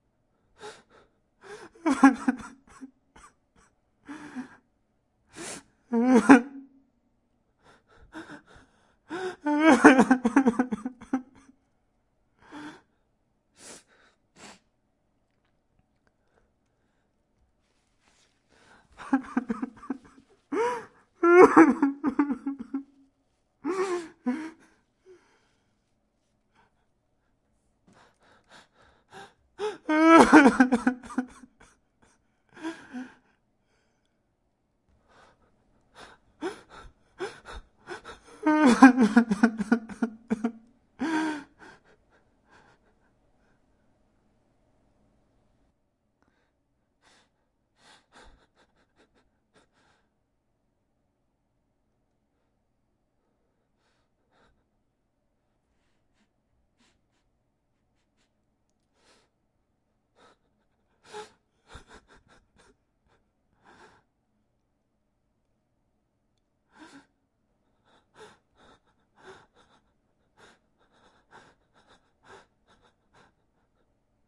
Man Crying and Whimmering
Crying as a reaction to some film scenes.
Recorded with a Zoom H2. Edited with Audacity.
whining, depressed, crying, cold, boy, dude, crybaby, emotional, sadness, young-man, wimp, reaction, whine, guy, tears, emotion, sad, male, cry, man